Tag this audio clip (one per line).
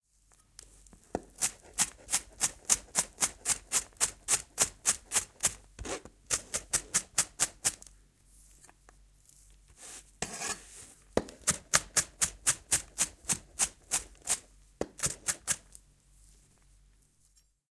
Primo
EM172
vegetables
LM49990
chef